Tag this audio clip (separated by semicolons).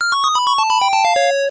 lose; digital; video; game; synth; die; life